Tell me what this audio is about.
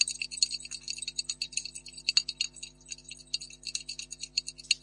Source Rec Perc
Good day.
The shells from the eggs in the bottle. Left and right - different. Stereo?
(Source sound - non-compression or eq, only noise reduction).
Support project on
digital, effect, sound, fx, efx